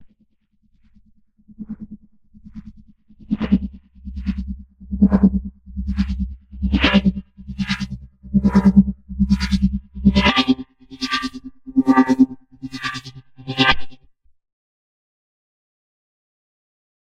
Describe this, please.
A trance Fx.
Dance, Fx, Processed, Trance, Psytrance